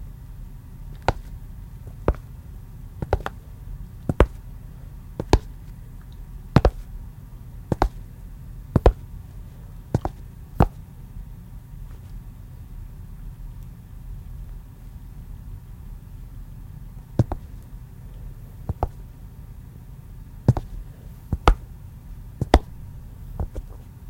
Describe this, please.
walking footsteps flat shoes tile floor 6
A woman walking on tile floor in flat shoes (flats). Made with my hands inside shoes in my basement.